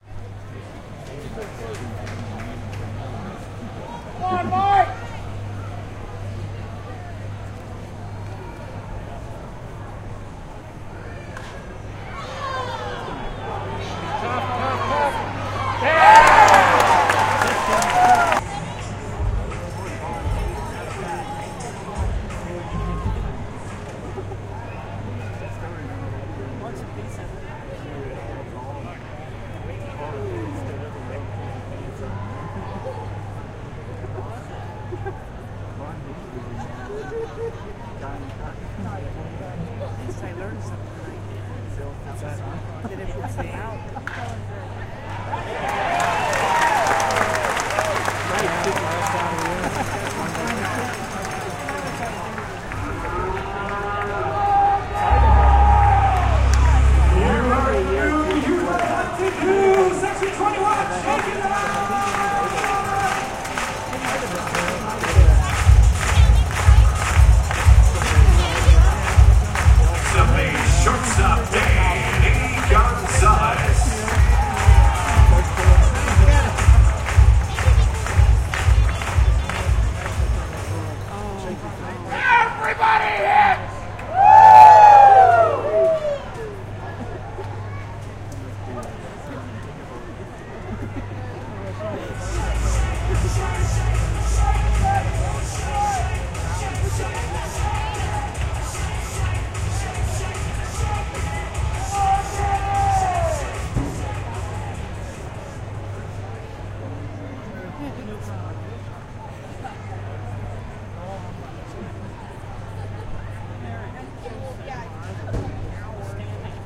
14shake it up01
Following a lead off home run, another home team batter gets a hit. There is low conversation among the crowd. This is followed by a promotion involving the crowd. Another batter is announced, and then a fan leads his own promotion, shouting "Everybody Hits"!
ambience, baseball, crowd, field-recording, league, minor